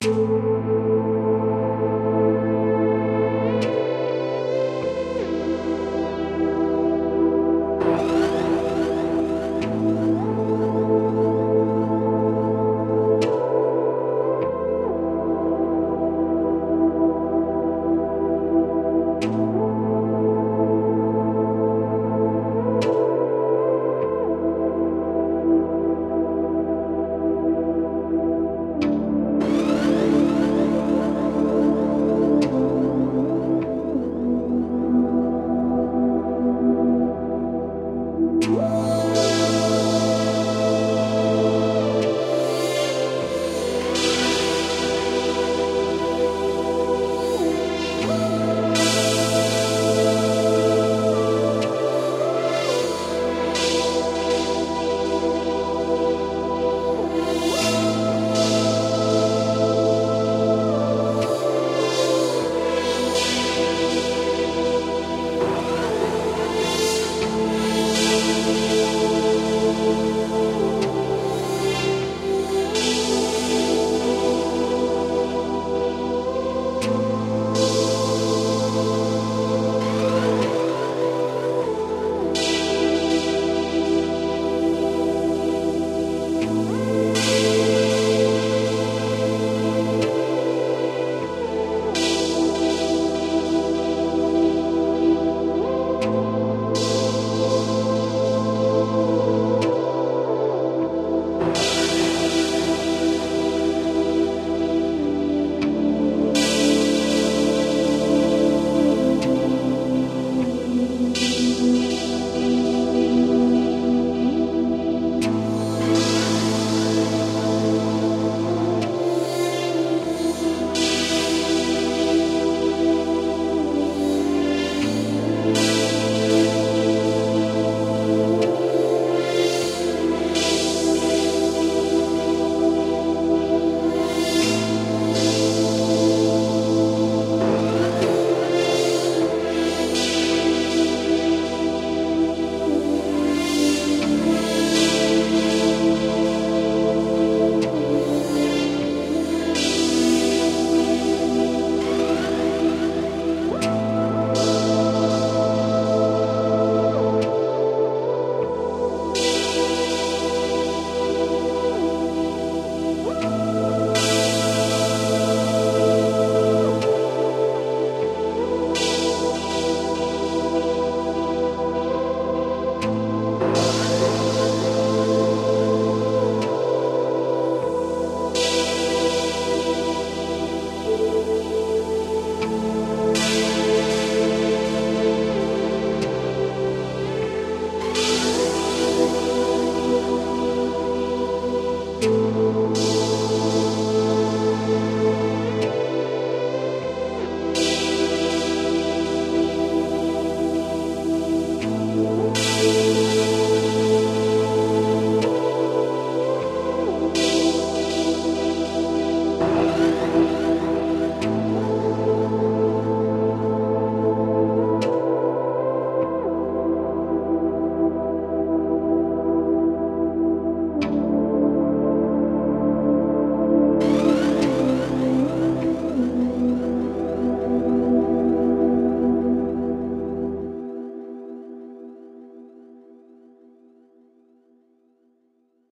Love Jesus Lead.
Synths:Ableton live,silenth1,Massive,minimoog va,Kontakt.
minimoog, fx, Lead